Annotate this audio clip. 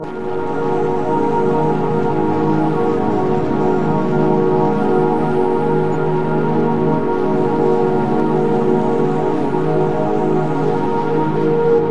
One in a series of strange ambient drones and glitches that once upon a time was a Rhodes piano.
ambient, drone, glitch, quiet, relaxing, rhodes, sound-design, synthesis